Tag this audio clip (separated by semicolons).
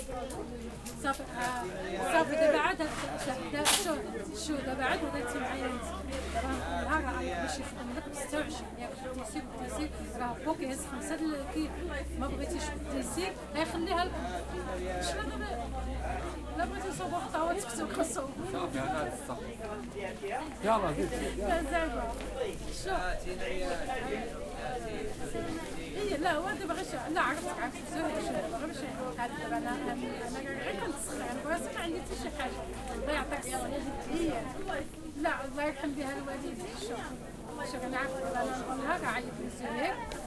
field-recording; morocco; people; street; voice